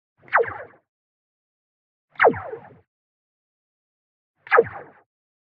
Laser blast x3
The sound of a "Star Wars" style laser gun firing off 3 separate blasts.
Created using a metal slinky.
ray-gun, science, shooting, zap, fiction, blaster, fire, retro, shoot, starwars, space, ray, weapon, gun, shot, lazer